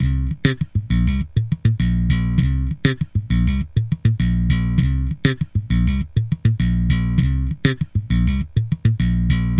SlapBass GrooveLo0p C#m 2
Funk Bass Groove | Fender Jazz Bass